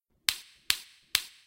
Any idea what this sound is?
Someone using a door knocker in a setting with a lot of echo.
door, knocker